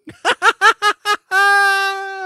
male Alphons laughing
male, Alphons, laughing witchlike
laughing, witchlike